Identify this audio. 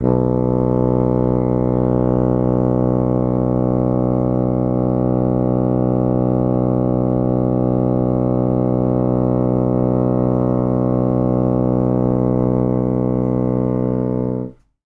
fagott classical wind